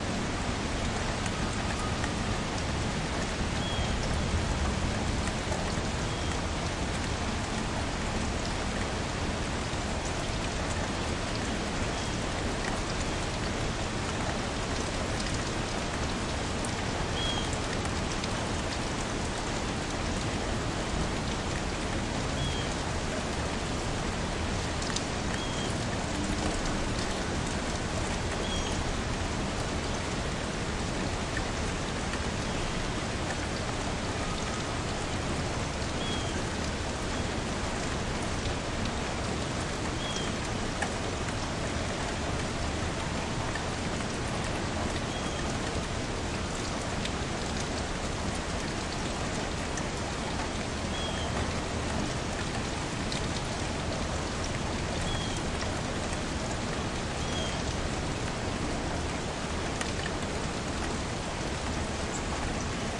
Urban Rain 02
Light rain recorded from an upstairs window facing an alley in an urban city environment.
field-recording, rain, storm, urban, weather